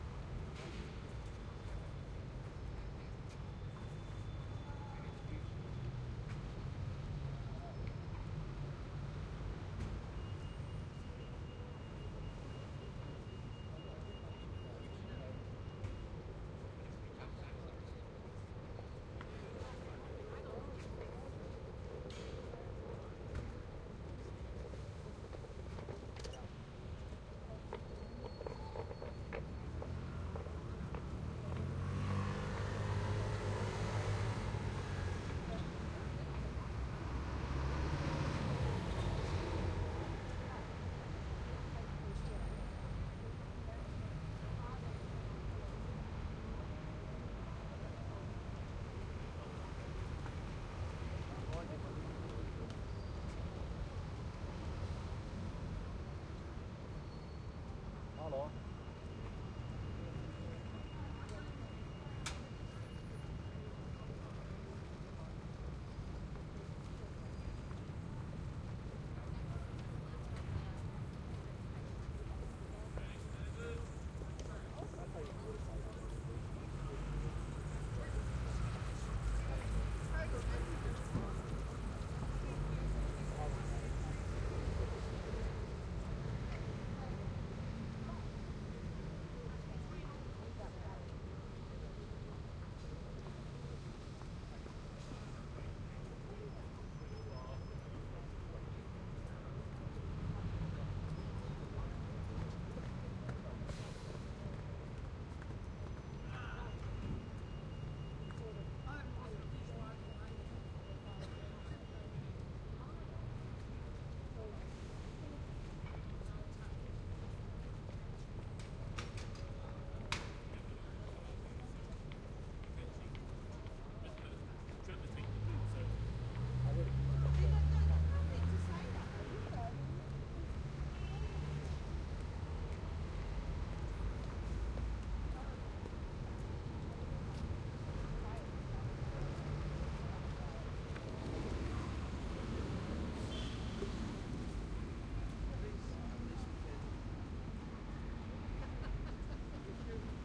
Ambience - Train Station - Outside
Outside Liverpool Street Station - East Exit - London - 3pm